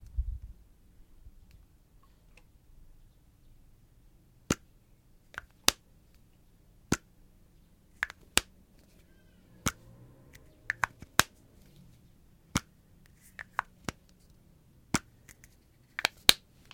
Base Lid Make-up
Another variation of a foundation bottle's lid being popped on and being taken off.
Close, Container, Girl, Hands, Hollow, Make-Up, Open, OWI, Plastic, Pop, Snap, Unique